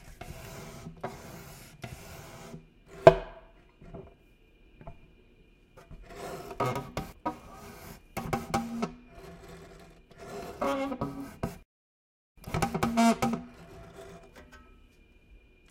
Music Stand Manipulation
Moving a music stand up and down to create a unique sound. This is the manipulated file.
Music, Stand, Violin